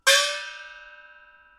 Recording of a single stroke played on the instrument Xiaoluo, a type of gong used in Beijing Opera percussion ensembles. Played by Ying Wan of the London Jing Kun Opera Association. Recorded by Mi Tian at the Centre for Digital Music, Queen Mary University of London, UK in September 2013 using an AKG C414 microphone under studio conditions. This example is a part of the "Xiaoluo" class of the training dataset used in [1].
beijing-opera, china, chinese, chinese-traditional, compmusic, gong, icassp2014-dataset, idiophone, peking-opera, percussion, qmul, xiaoluo-instrument